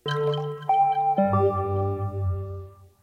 A small notification sound I made for use with my cell phone. Recorded on Audacity using a Korg Electribe and Casio Casiotone 405.
mobile, cell, blips, phone, reverb, pleasant, beeps, alert, simple, Notification